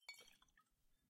For moving potions in inventory. made using glass of water.